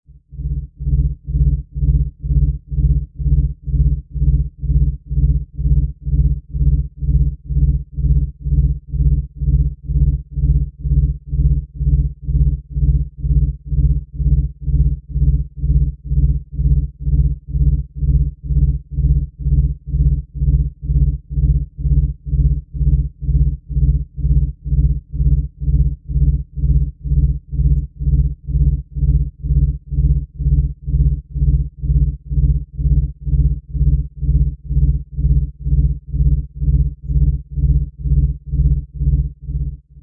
SYnth NoisesAX7
Artificially produced clips to be used for whatever you wish. Mix them, chop them, slice 'em and dice 'em!
Sine generator, amSynth, Petri-foo and several Ladspa and LV2 filters used.